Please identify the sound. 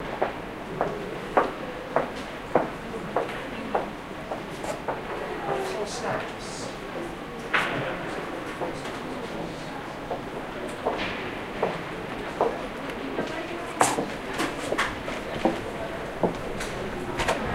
2 08 Br Lib shop steps
Footsteps in the shop of London's British Library.